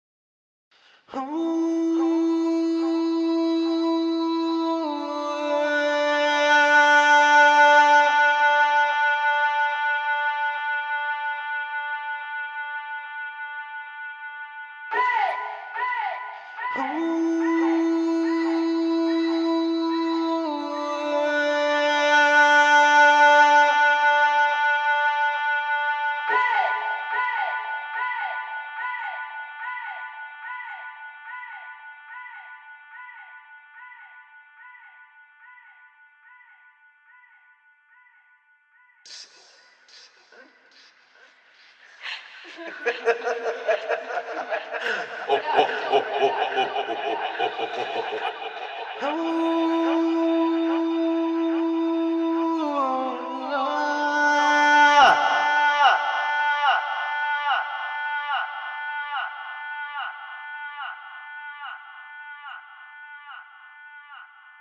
My Voice doing a long tonal draw Root note is B I believe
atmosphere, vocals, Vox